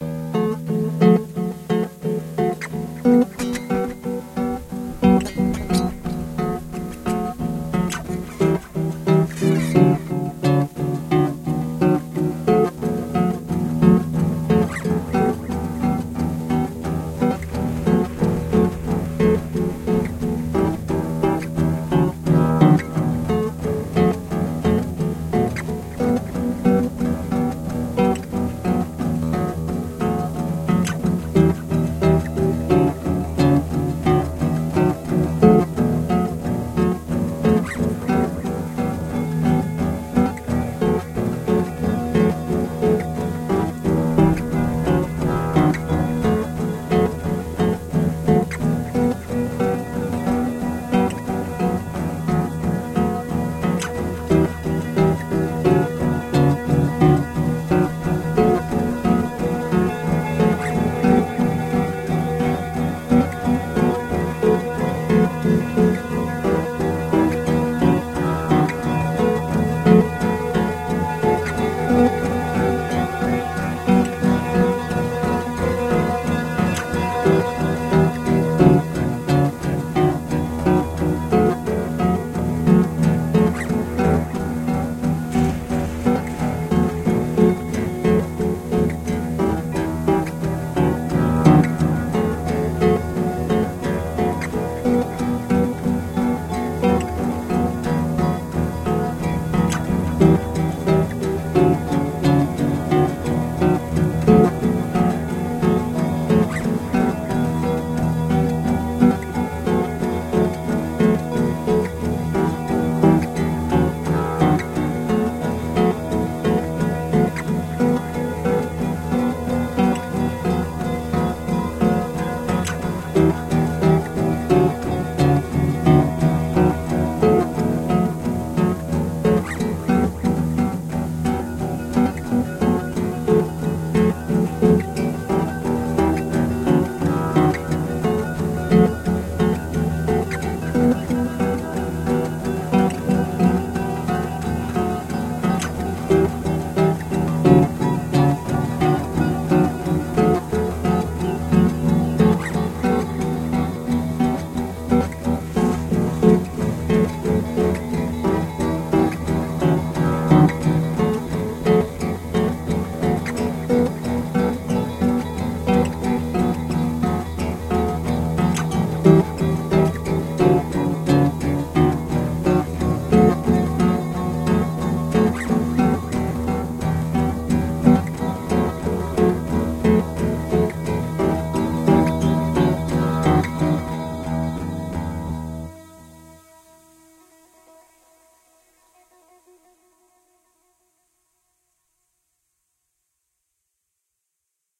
Spanish Guitar Loop
A somewhat Spanish sounding Acoustic Guitar Loop
acoustic, acoustic-guitar, classical, clean, guitar, loop, pluck, plucked, Spanish-guitar, string, strings